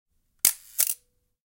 The sound of the Focal TLR 35mm camera with a shutter speed of 1/4 second